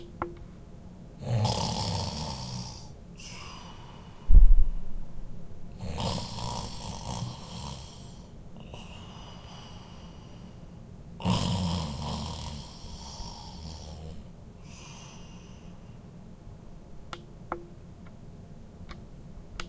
man snoring while sleeping
sleeping, snoring